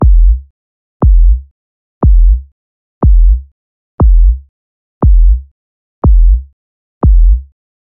Deep Kick Solo-120.bpm
Tags: deep bass drum 120 120bpm loop beats rhythm
My Music
rhythm, 120bpm, deep, loop, 120, drum, beats, bass